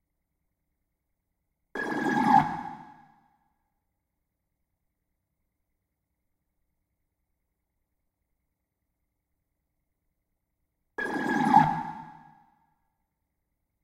A weird building noise using a talkbox running through a phaser.